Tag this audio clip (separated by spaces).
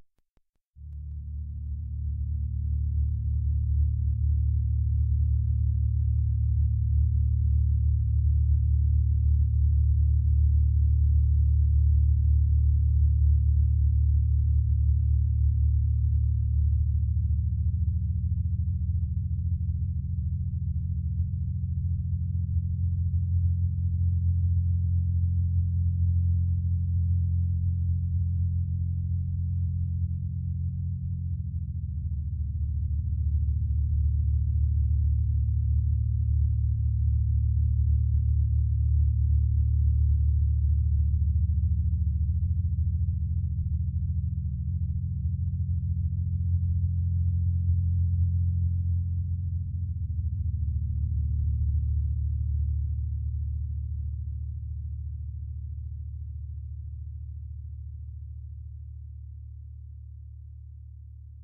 ambience ambient atmosphere background bridge dark deep drive drone effect electronic emergency energy engine future futuristic fx hover impulsion machine noise pad Room rumble sci-fi sound-design soundscape space spaceship starship